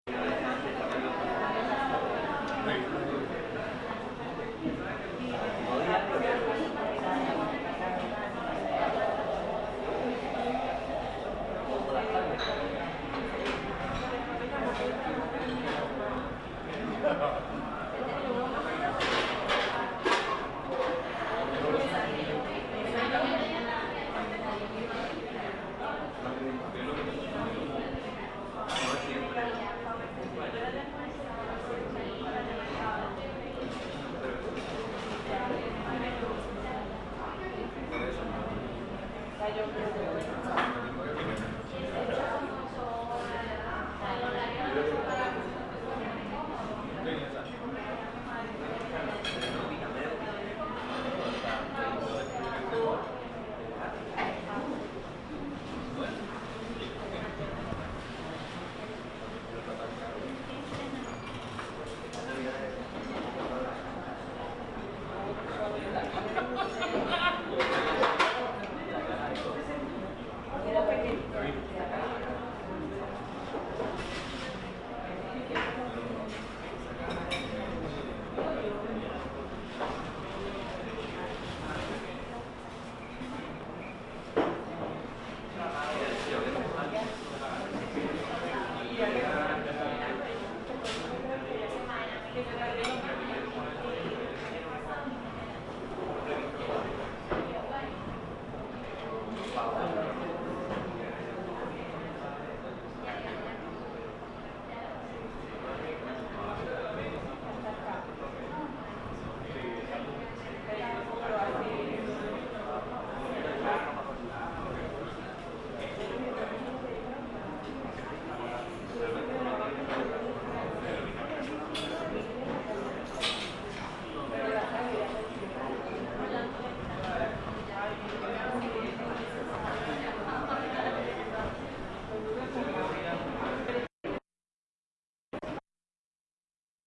restaurantr PR
recorded in Puerto Rico
efects, field-recording, live, stsound